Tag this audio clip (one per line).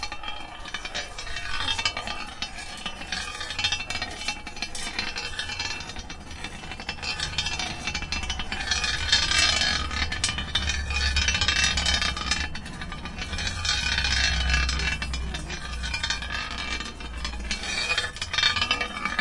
aes
Fieldrecordings
Lama
Sonicsnaps